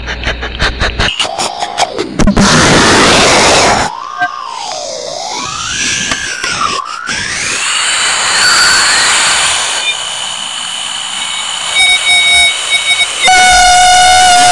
me and mah ring mod.
human, modulatior, ring, breath